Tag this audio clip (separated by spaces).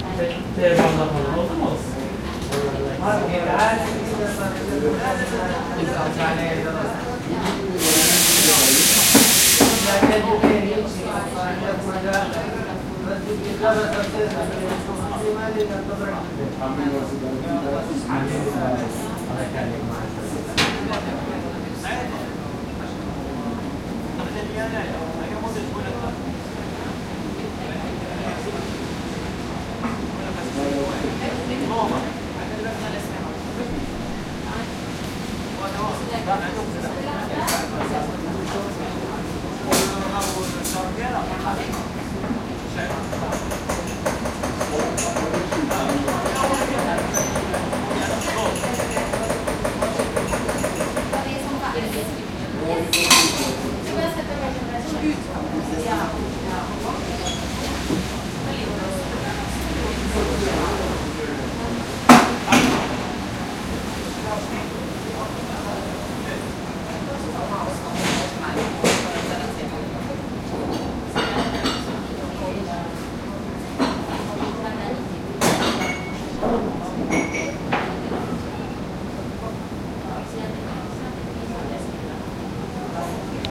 field int